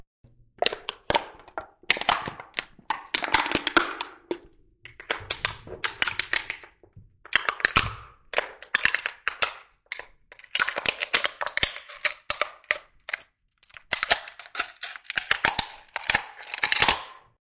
deforming can 1
Field-recording of can with natural catacomb reverb. If you use it - send me a link :)
can, deforming, destroying